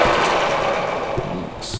Pillar Drill Shutoff
Mechanical, Machinery, Buzz, medium, machine, motor, electric, Factory, high, engine, Industrial, Rev, low